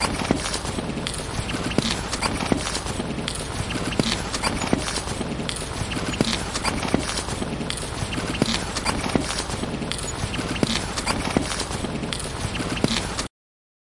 20131202 walking on wet dash ZoomH2nXY
Recording Device: Zoom H2n with xy-capsule
Low-Cut: yes (80Hz)
Normalized to -1dBFS
Location: Leuphana Universität Lüneburg, Cantine Meadow
Lat: 53.22838892394862
Lon: 10.398452281951904
Date: 2013-12-02, 13:00h
Recorded and edited by: Falko Harriehausen
This recording was created in the framework of the seminar "Soundscape Leuphana (WS13/14)".
University, Soundscape-Leuphana, Leuphana, xy, Percussion, Campus, walking, Outdoor